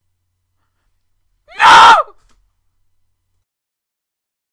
screaming no
This is clipped. I screamed too loud for the mic, but hopefully you get the idea.
woman-screaming, woman-screaming-no, no, fear, horror